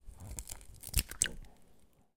egg - crack 01
Cracking an egg into a glass bowl.
crack, egg, egg-shell, shell